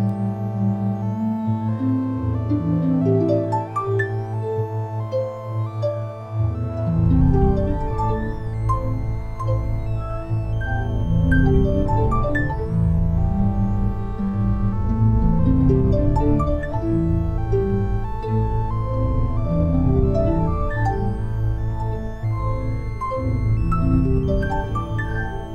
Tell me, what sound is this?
psc puredata toii